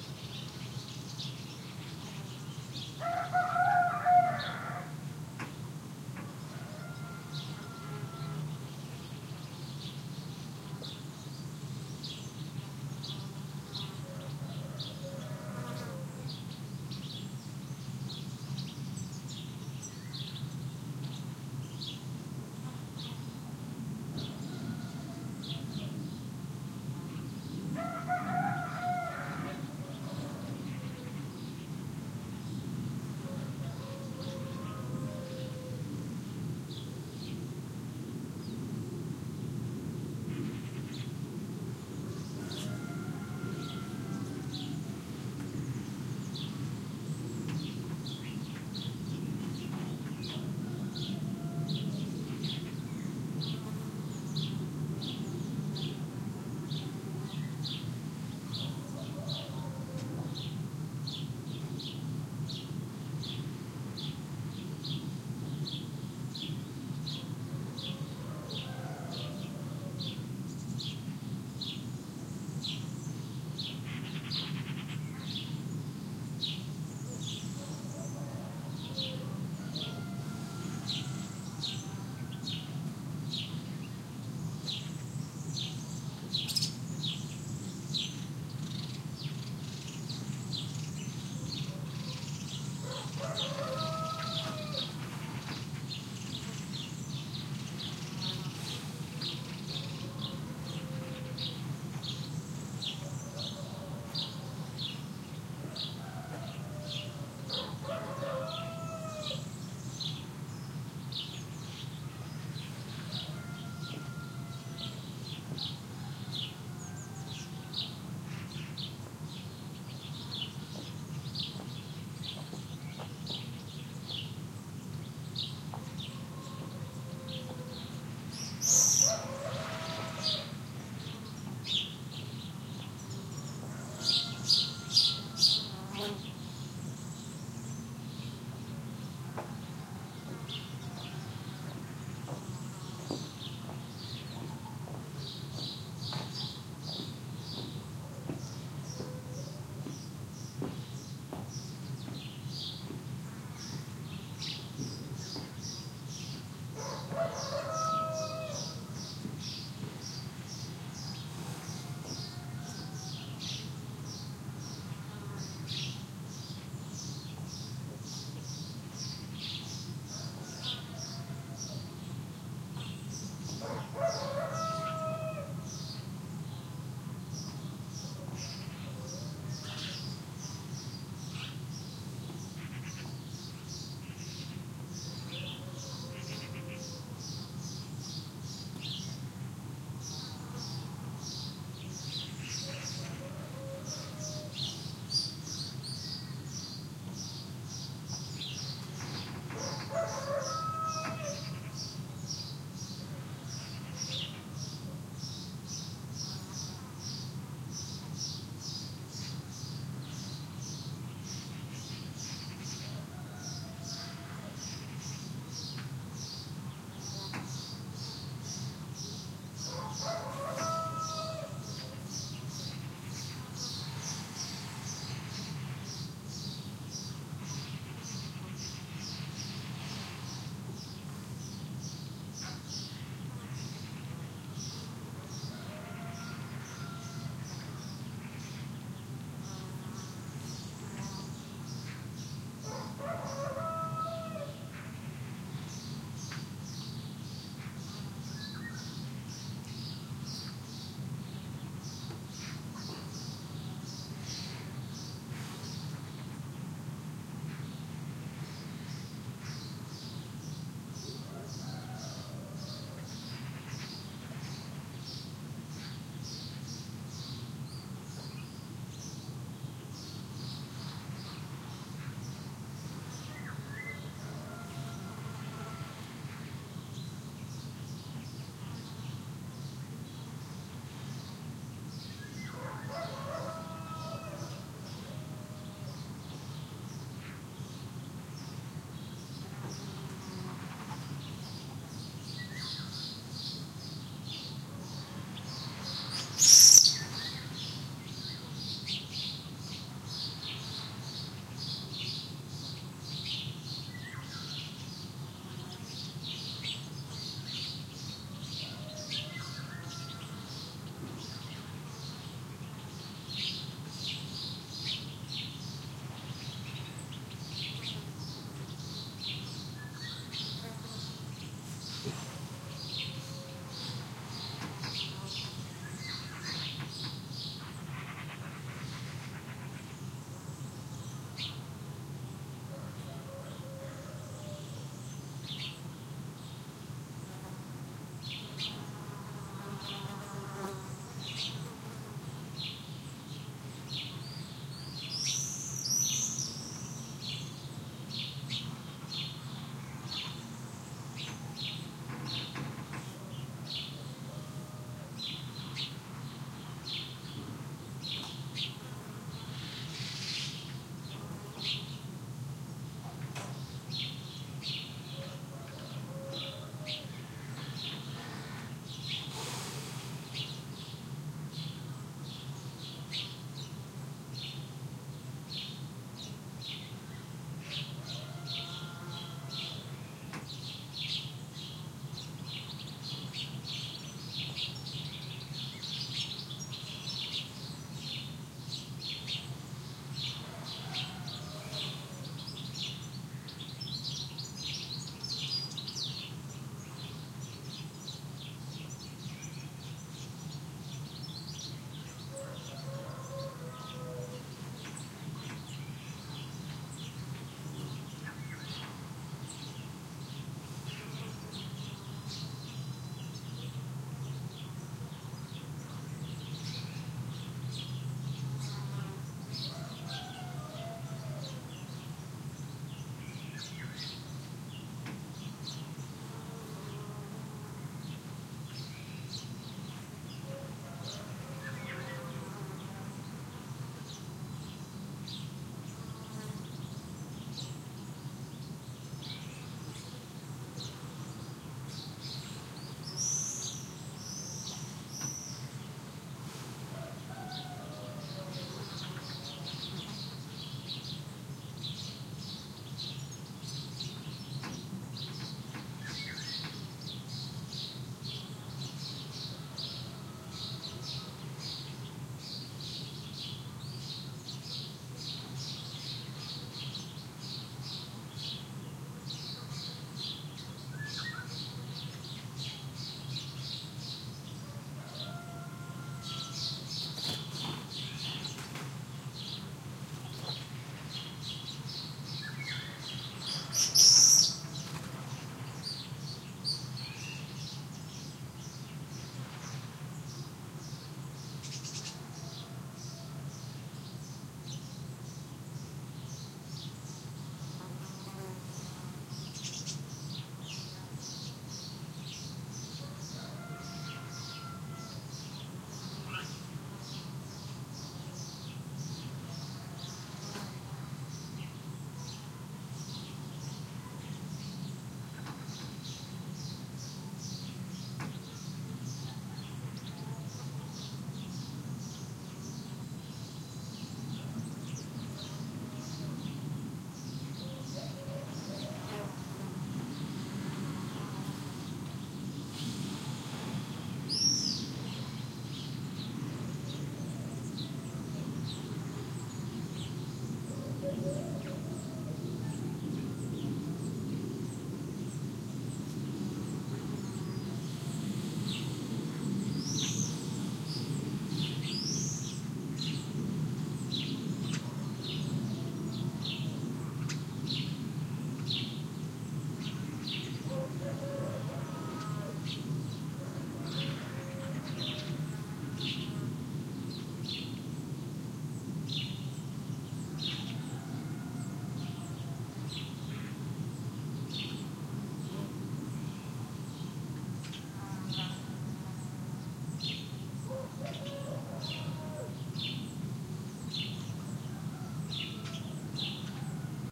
20120718 village.morning.01
Another take of early morning ambiance around a house in the countryside. Rooster, a plane overheading, birds calling (Sparrow, Magpie, Golden Oriole, Swift), insects buzz, footsteps on a wooden floor. Near the end another plane overheads. You may remove low-freq hum, if you find it annoying (headphones). Recorded at the small village of Orellán (Leon province, NW Spain) with Primo EM172 capsules, FEL Microphone Amplifier BMA2, PCM-M10 recorder.